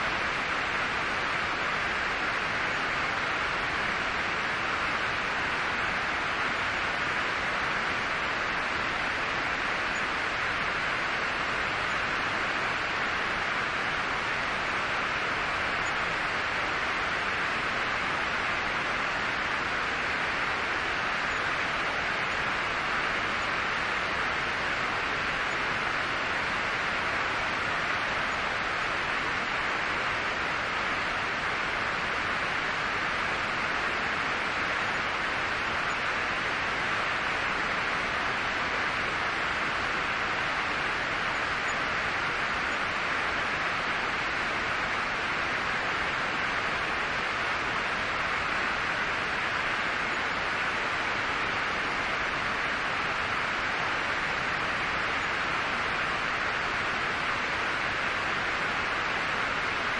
Heavy Rain – Silence, Ambiance, Air, Tone, Buzz, Noise
This is a series of sounds created using brown or Brownian noise to generate 'silence' that can be put into the background of videos (or other media). The names are just descriptive to differentiate them and don’t include any added sounds. If the sound of one is close, then try others in the pack.
Air, Ambiance, Artificial, Background, Buzz, Generated, Noise, Plain, Silence, Simple, Soundtrack, Tone